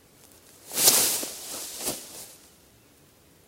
Collapsing in grass
Collapsing in thick grass. This audio shows that the person could go no farther, fell to his knees, then keeled over. A great piece of audio for a movie or video.
falling, collapse, thud, Collapsing, fall, grass